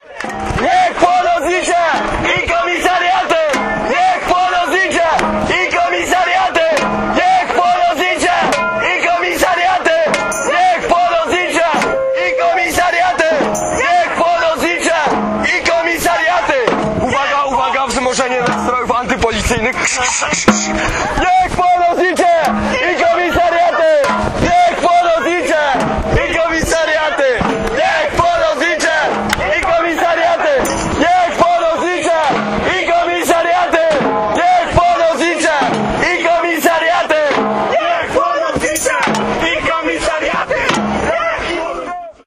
niech plona znicze dwaaaa
action samba scanding anticop slogans on an anticop demo held after an african man was murdered in warsaw in 2010